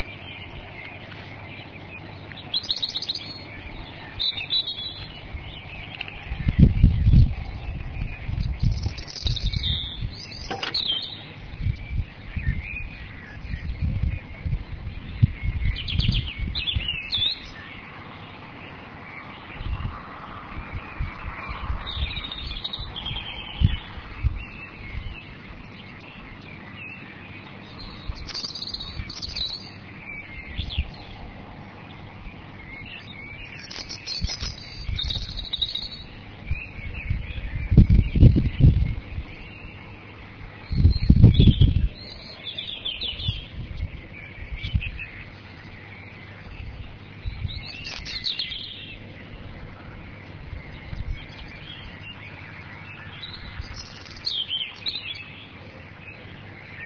Bird chorus on a May morning in an English county town, for OU module T156 week 2 activity 2. Created as a .dss file on an Olympus recorder.
chorus, bird, birdsong, dawn